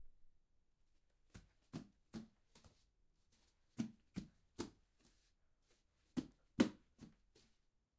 Running On Wood
This is the sound of someone running softly on a wooden floor. Recorded with Zoom H6 Stereo Microphone. Recorded with Nvidia High Definition Audio Drivers.
OWI, Running, Running-on-Wood